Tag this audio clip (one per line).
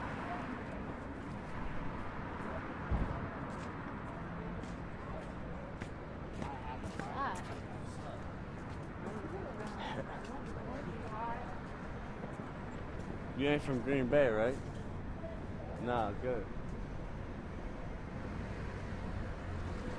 pittsburgh
steelers
football
ambiance